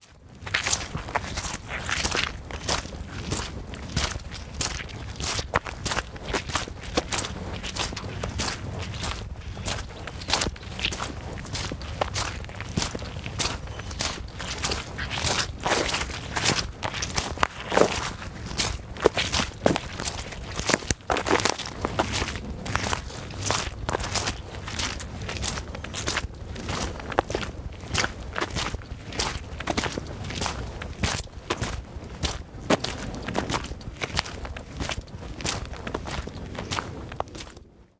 Footsteps Walking Boot Mud and Twigs
A selection of short walking boot sounds. Recorded with a Sennheiser MKH416 Shotgun microphone.
cracking, foley, footsteps, mud, outdoors, sfx, squelch, twigs, walkingboots